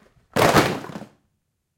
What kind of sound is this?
jello-boxes-fall
I needed to have a bunch of jello boxes fall on someone head for a film I was putting together, and this was the sound I came up with for that :-)
Stay awesome guys!
boxes, crash, falling-boxes, falling-good, items-fall, object-fall, objects-fall